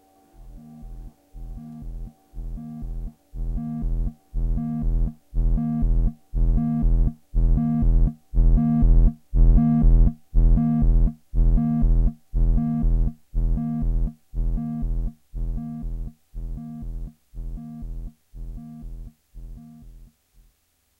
From series of scifi sounds. Arturia Microbrute analog synth through Roland SP-404SX.